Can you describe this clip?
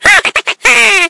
Laughter of a small creature